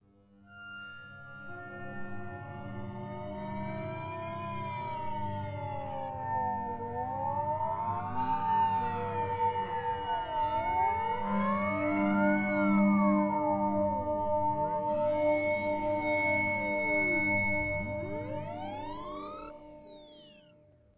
Ds.ItaloW.TrumpeterGirl.4
desperate call for Help. S.O.S.